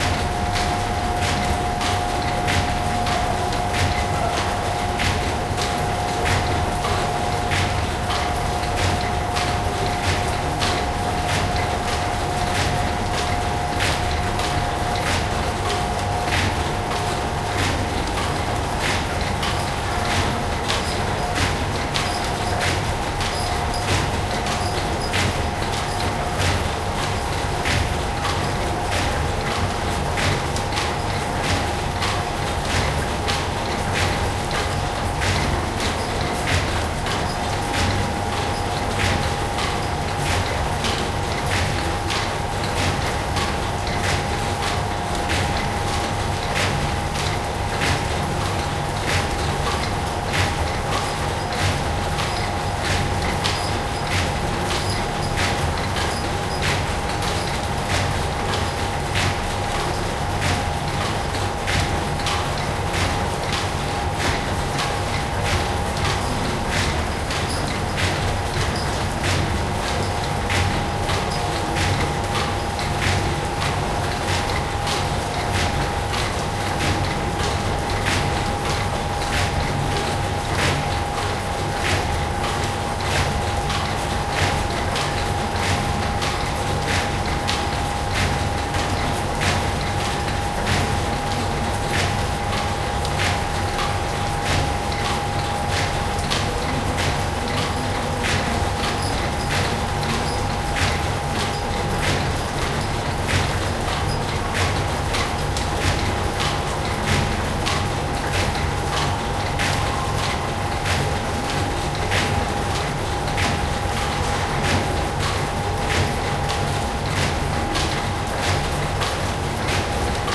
Sound from Die Sinking Workshop located at the Kelham Island Museum in Sheffield. Recorded on May 27, 2018, with a Zoom H1 Handy Recorder.